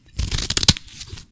scissors cut 6
Scissors cutting through several layers of paper